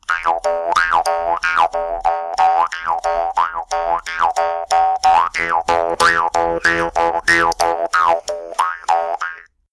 Jew's Harp 2
Playing the jew's harp.
Recorded with an Alctron T 51 ST.
{"fr":"Guimbarde 2","desc":"Une guimbarde.","tags":"guimbarde musique instrument"}